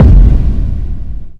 Hard DP09
This is a heavy bass-drum suitable for hard-techno, dark-techno use. It is custom made.
boomer, bassdrum, kickdrum